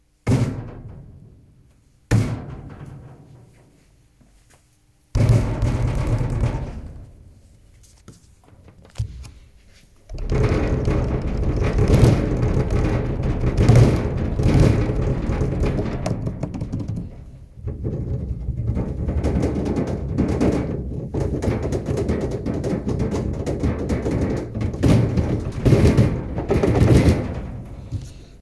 Banging-Slamming Metal Cupboard
Recorded both ON top of cupboard and inside. Sounds similar to a metal door / wall too on some of the hits.
bang, banging, creepy, cupboard, desk, heres, hit, johnny, metal, slam, table